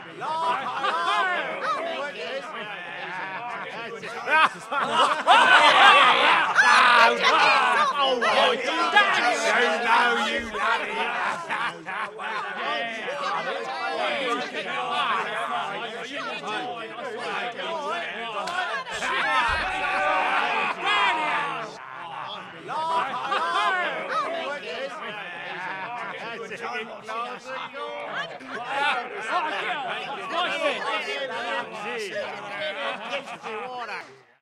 background2(bar or pub)
Background murmuring effect for a bar or pub. 2 SM58s to a Mackie to an Audigy soundcard.